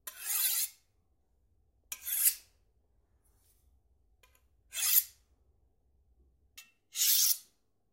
Knive running over steel
Knive scraping on steel, short sound
steel, scraping, sound, metal